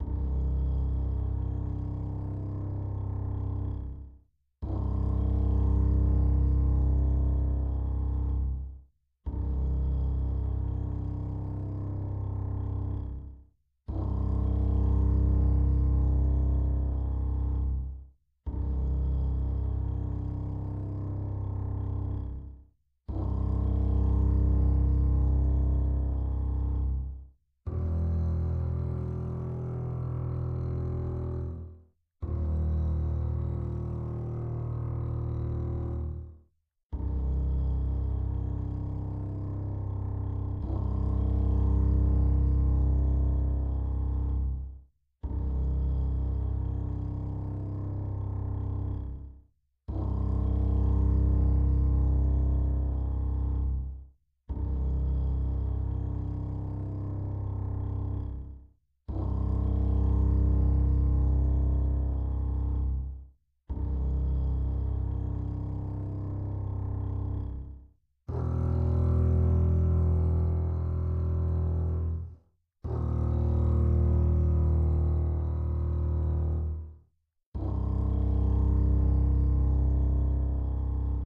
The sound of cellos droning.